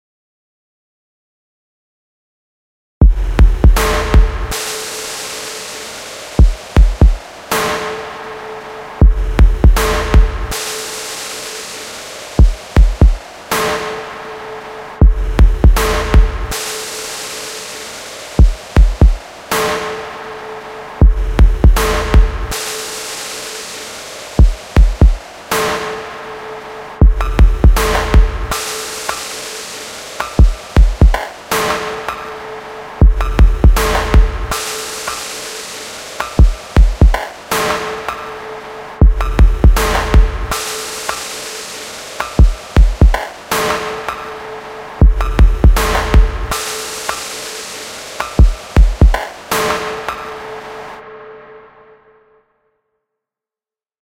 80 bpm doom-industrial groove
background, electronic, industrial, music, rock, score